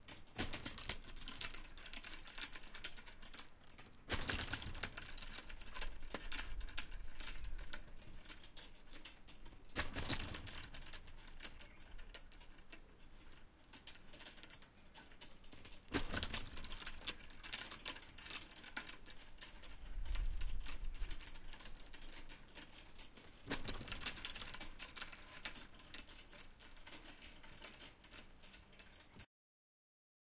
Heavy chain being shaken and pulled taught.
chain, OWI, rattle